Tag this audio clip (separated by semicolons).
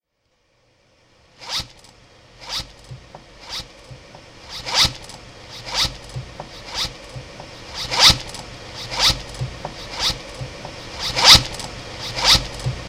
experimental; beat; distorded